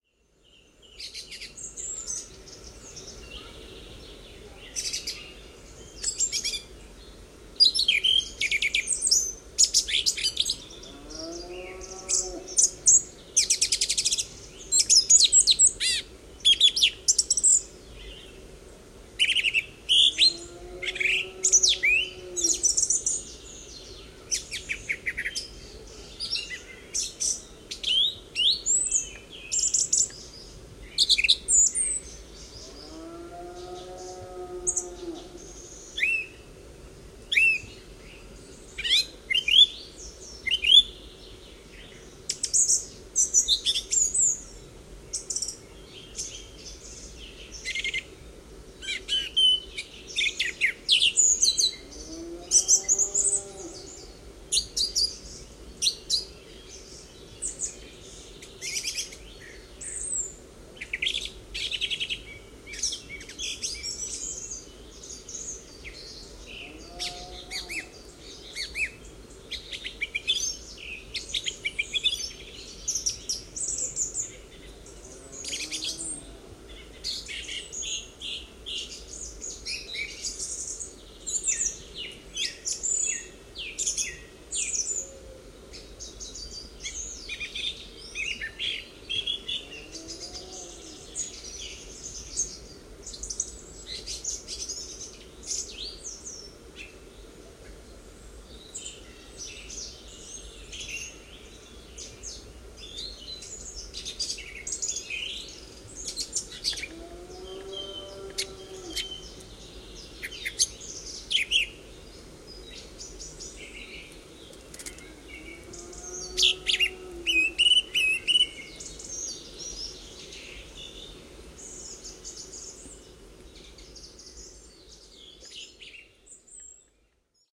1128cows and songthrush
Valley in the Sauerland mountain region at nightfall, with songs of songthrushes and moowing cows in the background, sound of a brook. Vivanco EM35 on parabolic dish with preamp into Marantz PMD 671.
song, nature, field-recording, evening, forest, birds, cows, spring, thrush